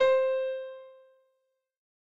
120 Concerta piano 04
layer of piano
piano, string, free, layer, loop, concert